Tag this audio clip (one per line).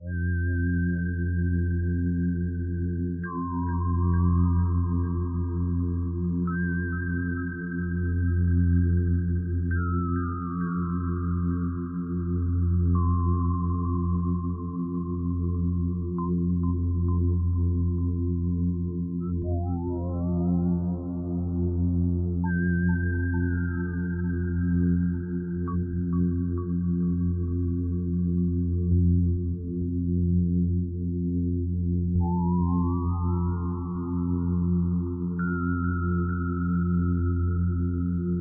loop
drone
zebra
ableton